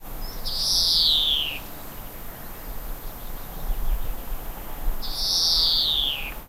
A short sample of the harsh call of a greenfinch recorded 8th March 07. Minidisc recording. In the background is the sound of wildfowl at the reserve where this was recorded - Fairburn, England.